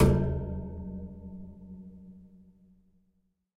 efan grill - hit 1
An electric fan as a percussion instrument. Hitting and scraping the metal grills of an electric fan makes nice sounds.
electric-fan, reverberation, metallic, sample